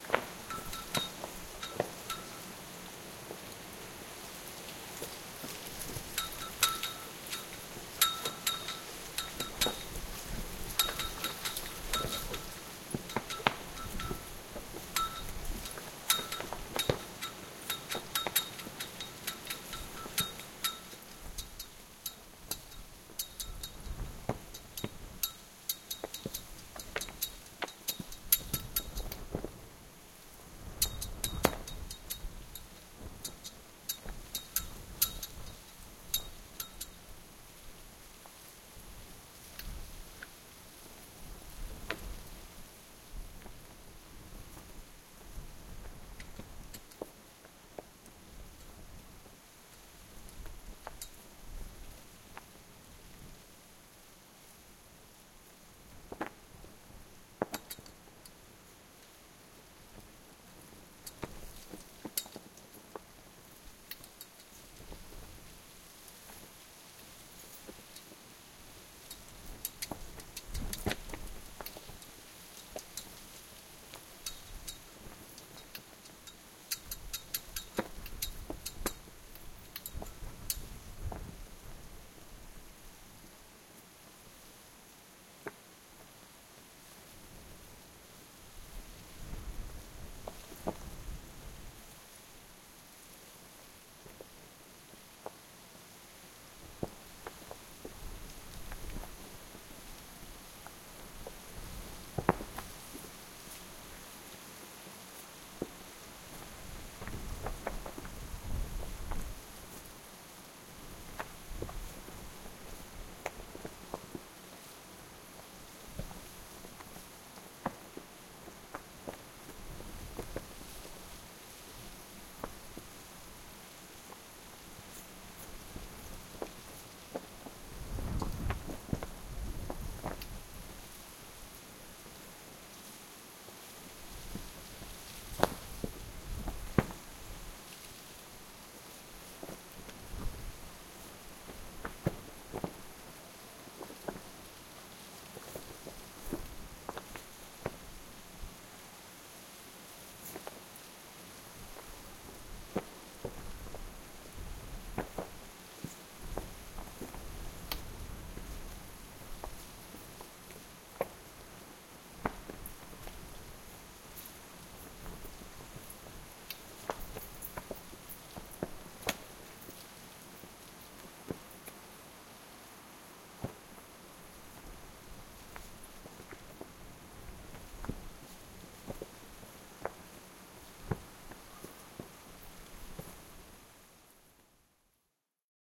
180219 Flag flapping in wind, noisy BAHAMAS
Flag on pole flapping in the wind. Bahamas. Rode NT2
flag, flapping, wind